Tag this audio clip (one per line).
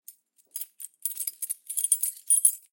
Design
Door
Foley
Jingle
Jingling
Key
Keys
Lock
Rattle
Real
Recording
Sound